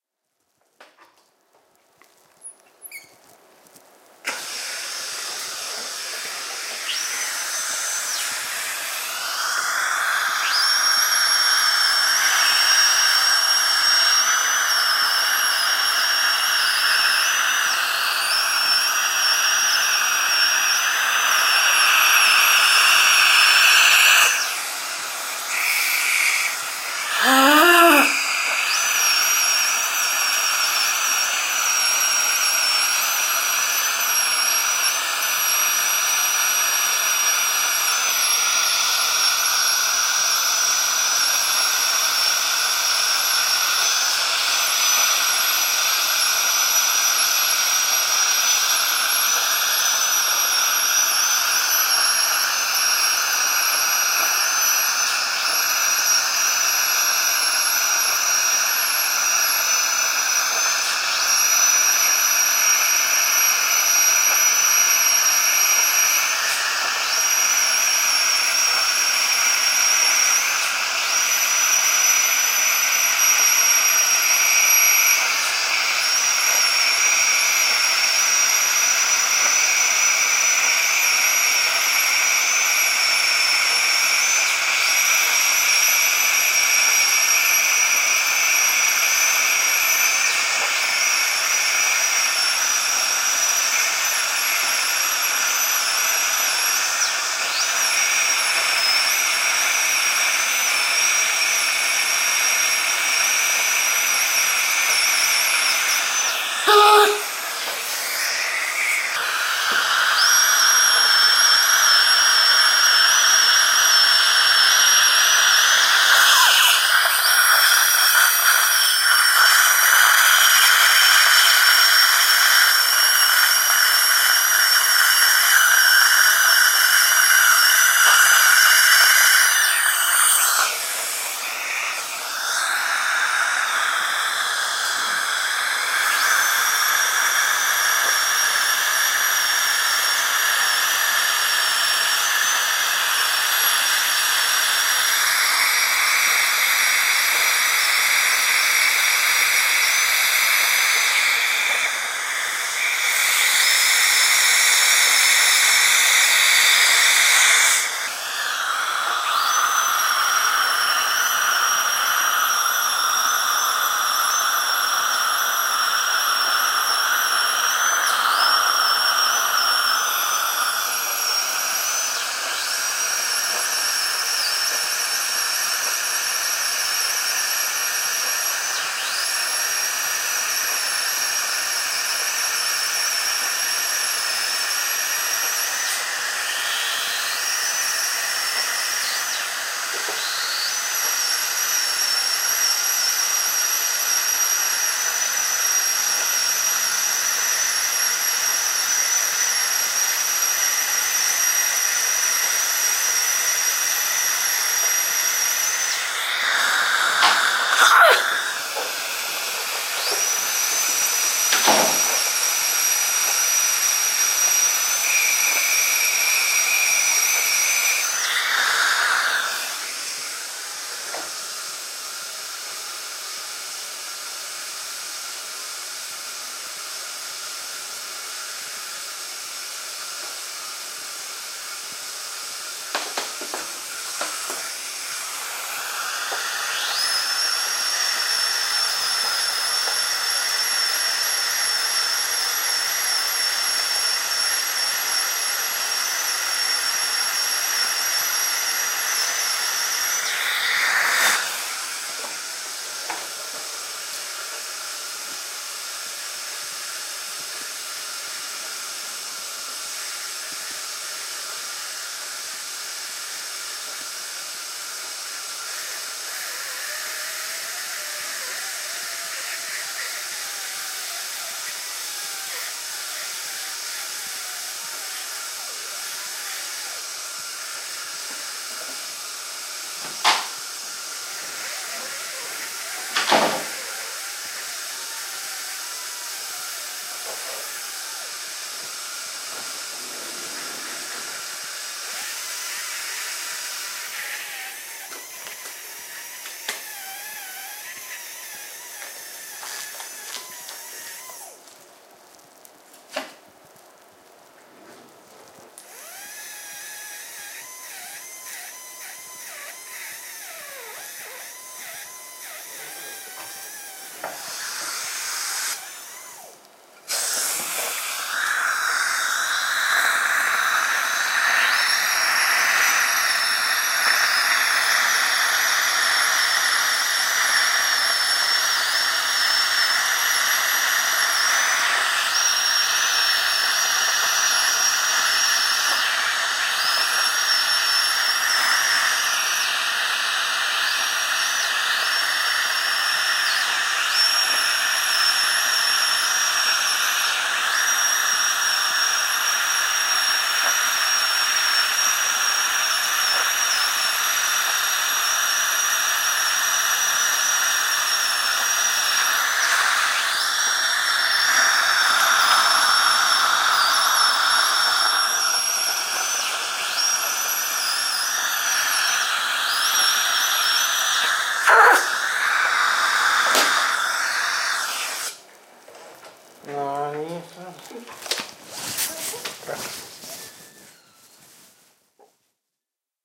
A stereo recording of a dentist cleaning plaque from my teeth, the farting noises are my cheeks being sucked into the suction pipe. Zoom H2 front on-board mics.